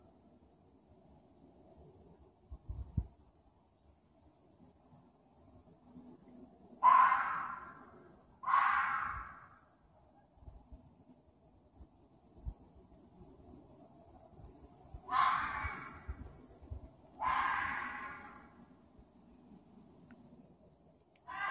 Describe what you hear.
red fox screeching red fox screechingred fox screechingred fox screechingred fox screechingred fox screechingred fox screechingred fox screechingred fox screeching
barking, dogs, fox, red, screeching